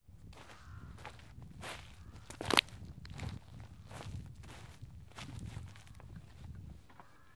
One person, 8 steps on gravel. Recorded on Zoom H4n using RØDE NTG2 Microphone. No post processing.